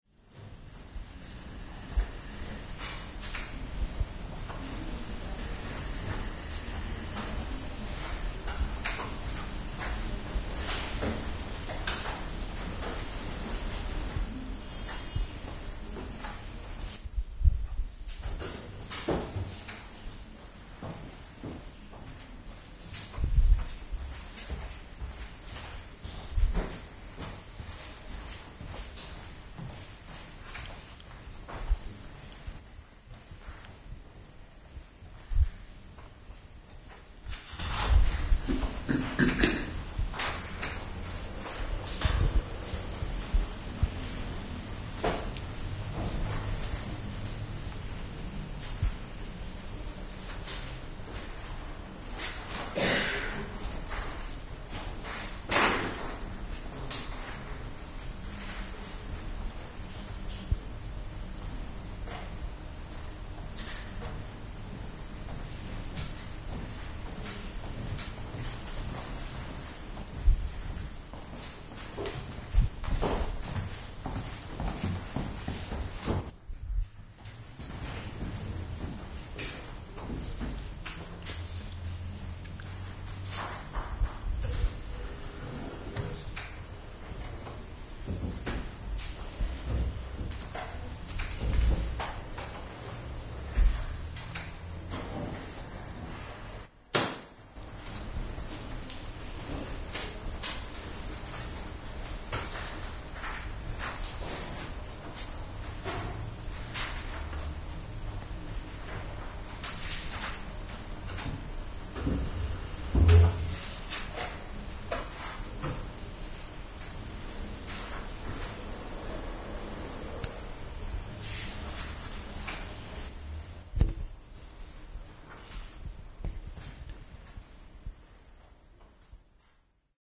library cut
Here is the sound place of the library of the Cyprus University of Technology. You can hear footsteps, low talking and book grabbed of the shelfs. All these in the silent that a library can offer.